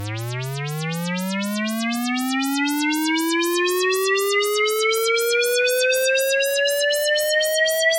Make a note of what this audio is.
VALENTIN Alexis 2015 2016 UFO-engine
This final sound was generated from a whistle, created with frenquencies going from 300 Hz to 600 Hz, with an icreasing amplitude. A wah-wah effect was added to created a modular sound that seems like an engine from an unknown spaceship.